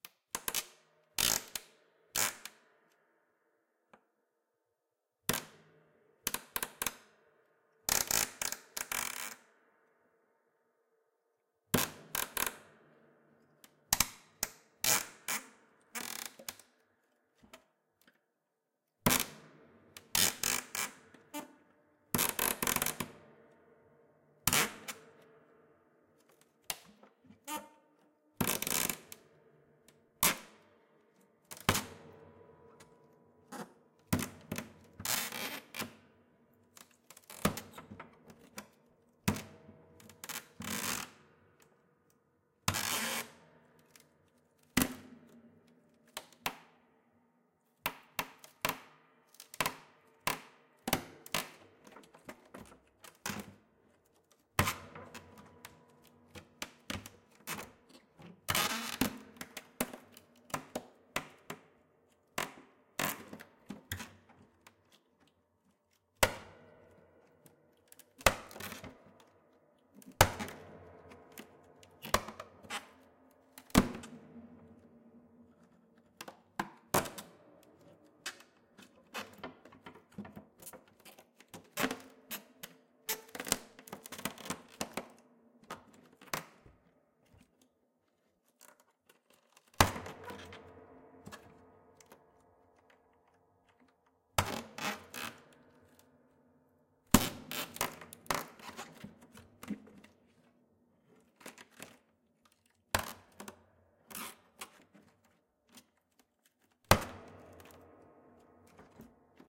Piano Destruction - Squeak 3
Wooden shards of a partially destroyed piano being jiggled to produce a squeaking sound. Recorded close so less of the room is heard. Recorded in 2001.